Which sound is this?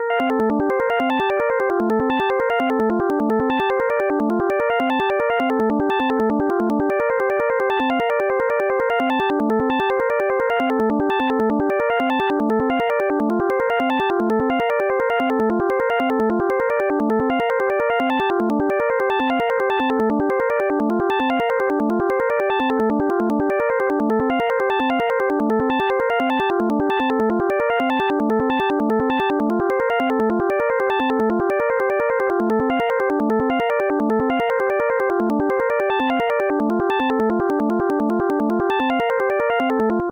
SB Pitches v2
Sound sketch using Markov process to generate a minimal sound scape using 10 sine wave tones at frequencies from the c-minor scale. Fast and glitchy sounding.
algorithmic, arpeggio, click, csound, fast, markov, random, sine